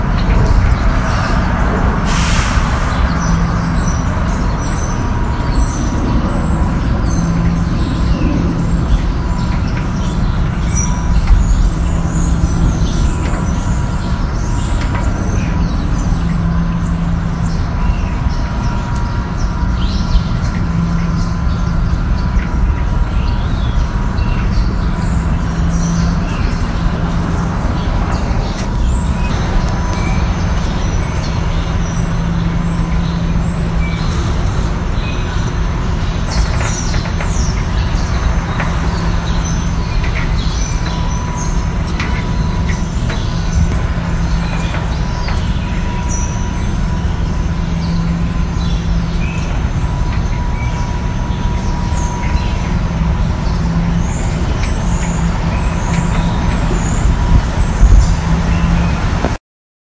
metal gate with birds behind it
Kind of a weird sound! Birds behind a large metal garage door in Brooklyn. Recorded with an iPhone. Also wind and street noise. Good luck using this one!
field-recording, gate, street